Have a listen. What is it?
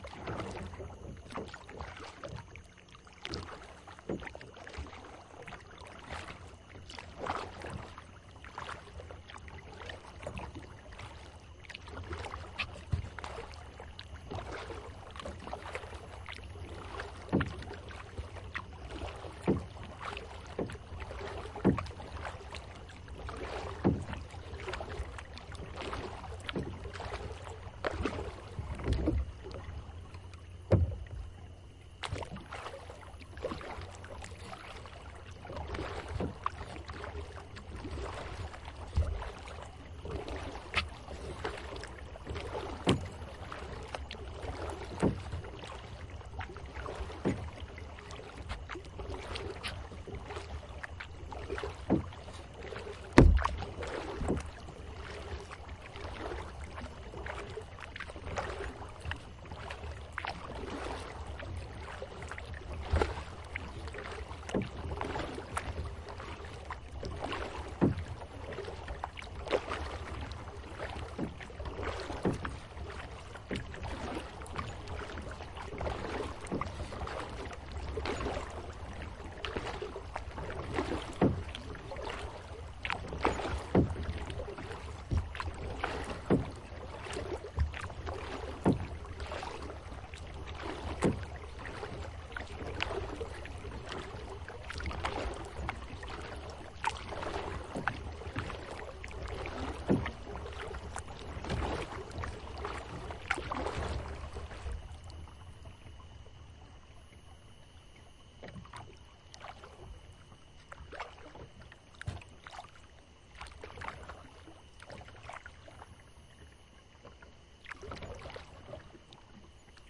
Paddling a kayak gently on a quiet, still lake at night. Some bumping sounds from the paddle hitting the boat, but mostly splashing, paddling sounds. Recorded with a Tascam DR-40
boat, canoe, kayak, lake, paddle, splash, Water